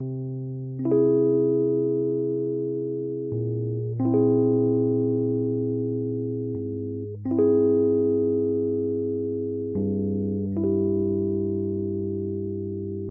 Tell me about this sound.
rhodes loop 1
Rhodes loop @ ~74BPM recorded direct into Focusrite interface.
loop, 74bpm, rhodes, electric-piano, keyboard, electroacoustic, vintage